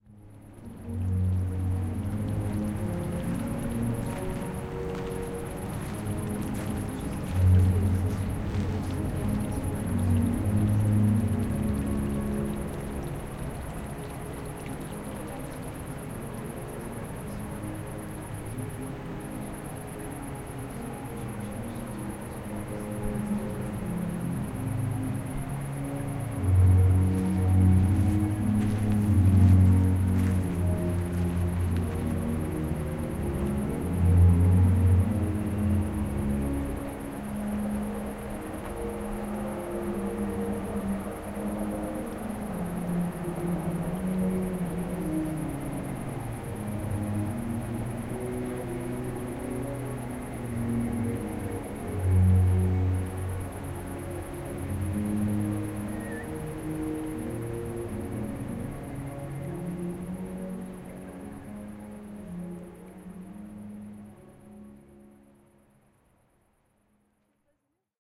Recorded during Musical Fountains Show at Versailles palace (by night).
Music playing in background.